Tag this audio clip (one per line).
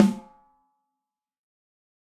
14x6
accent
beyer
breckner
combo
drum
drums
electrovoice
josephson
kent
layer
layers
ludwig
mic
microphone
microphones
mics
multi
neumann
sample
samples
shure
snare
technica
velocity